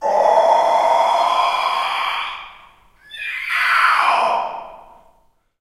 Male Inhale scream 1
Male screaming by inhaling in a reverberant hall.
Recorded with:
Zoom H4n
creature, monster, inhale, male, schrill, shriek, scream, animal